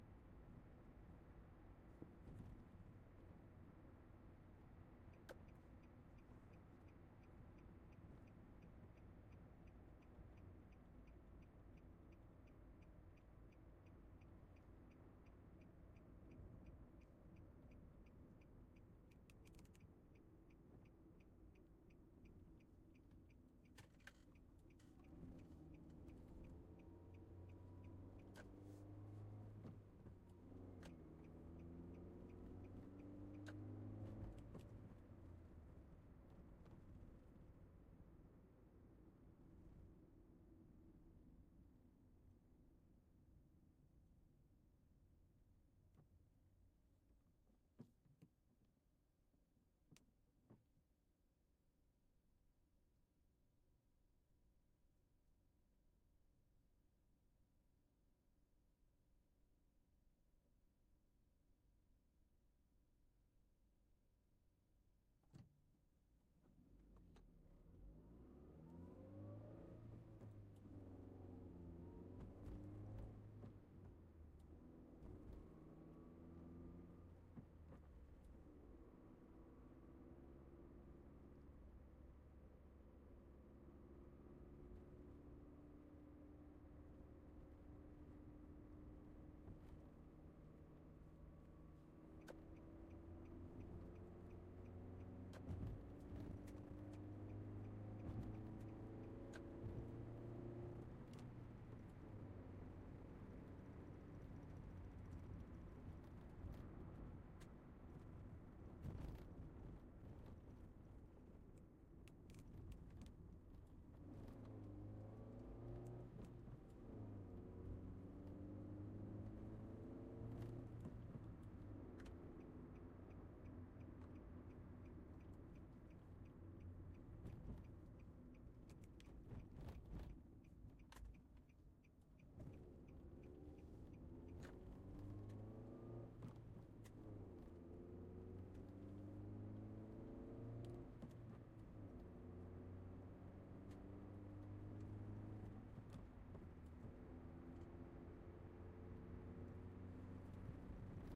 Car Ambiance Edited
This is ambiance taken from the inside of a moving car. It captures accelerating, breaking, stopping, indicating and gear changing
Accelerator, Ambiance, Brake, Car, Cars, Drive, Engine, Free, Gears, Highway, Indicator, Inside, Motor, Motorway, Movement, OWI, Rattle, Ride, Silence, Speed, Street, Transport, Transportation, Travel